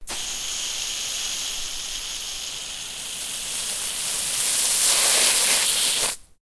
splashing the water round and round from a hose
hose, splash, water